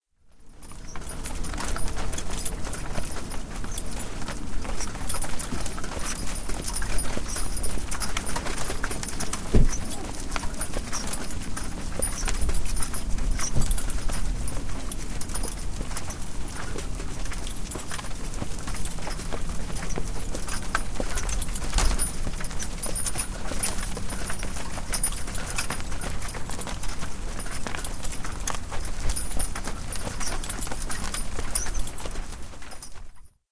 horse carriage 2-edited
A short clip of a single-horse carriage rolling on a grass and gravel path.